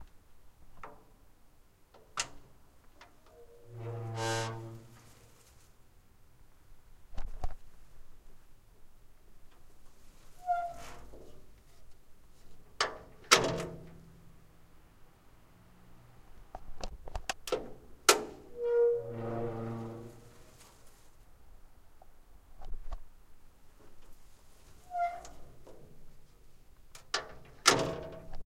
old hinged metal door
An old metal door opening and closing. Handle sounds and squeaking hinges. Recorded with a Tascam DR-1 with its own stereo mic.